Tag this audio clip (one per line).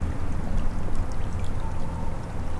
stream
Water
water-fall